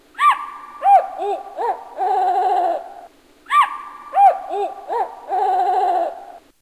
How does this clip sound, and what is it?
ghost voice reduced
human, bird, voice, slowed, reduced, decelerated, delayed